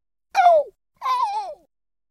Making squeaky noise with my throat. Recorded on (stationary) MiniDisk. Microphone: Dynamic Ramsa WM-V001E. No Reverb.